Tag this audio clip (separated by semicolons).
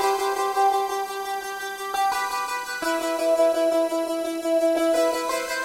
electronica; high; synth; thin